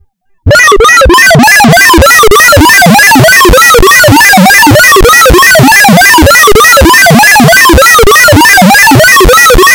(WARNING: VERY LOUD!!!) robot
I was fooling around with Audacity and created this neat little sound.
I have no clue how to lower the volume, and really, I mean it: THIS THING IS LOUD. I keep my volume at 10% and I couldn't even listen to this thing with my headphones. I have tried to lower the volume (so that it doesn't hurt the ears) but to no avail.
If you use it I don't mind at all, though I am curious, if you do use it, what you'll use it for. Either way, I don't mind, but I'm curious by nature.
alien, android, beep, beeping, bionic, computer, cyborg, gadget, galaxy, machine, mechanical, robot, robotic, spaceship